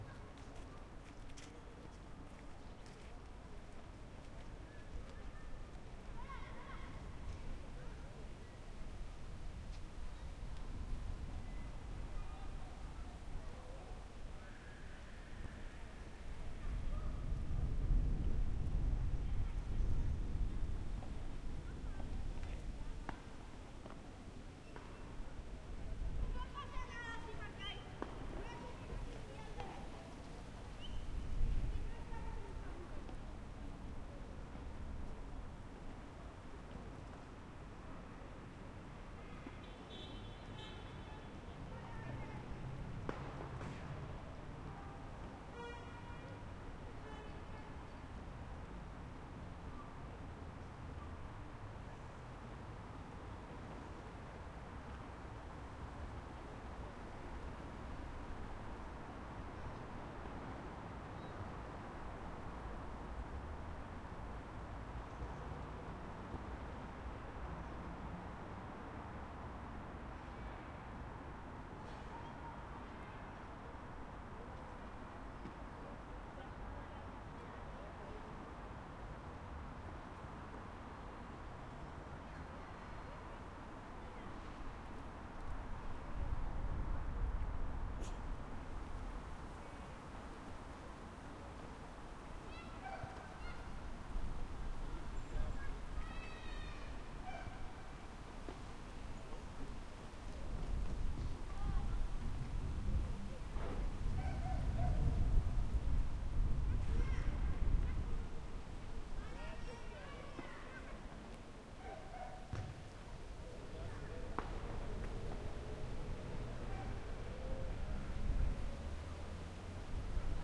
Calidoscopi19 Canòdrom 1
Urban Ambience Recorded at Canòdrom in April 2019 using a Zoom H-6 for Calidoscopi 2019.
Pleasant, Monotonous, Nature, Quiet, SoundMap, Humans, Simple, Calidoscopi19, Congres